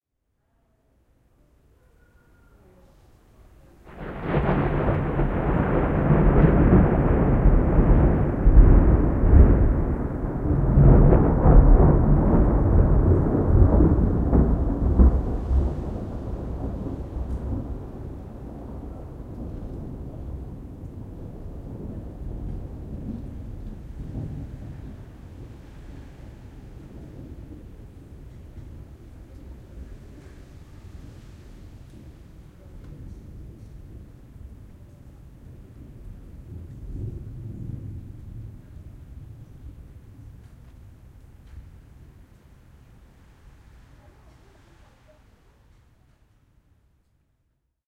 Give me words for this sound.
City thunderclap
ambience, street, Thunder